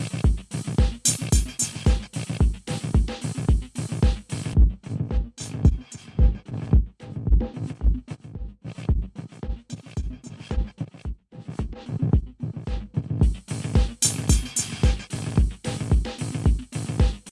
Different & Phase
Only the built in filter was used.All samples start with the unprocessed loop (VST Effect bypassed) and then the effect is switched on.This sample is a rhythmic loop running through the Trance Gate pattern gate and built in filter with LFO. Filter set to LP with very high settings on resonance, cutoff and modulation.This sample is a rhythmic loop running through the Trance Gate pattern gate and built in filter with LFO. Slightly different gate patterns and filter settings used to process the left and right channels, the phase on one of the channels was also inverted before mixing back.
drums, effect, filter, gate, lofi, loop, test, vst